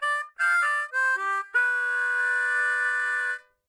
C Harmonica Rift 10
This is a rift I recorded during a practice session.